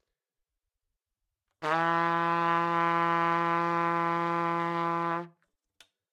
Part of the Good-sounds dataset of monophonic instrumental sounds.
instrument::trumpet
note::E
octave::3
midi note::40
good-sounds-id::2822
E3, good-sounds, multisample, neumann-U87, single-note, trumpet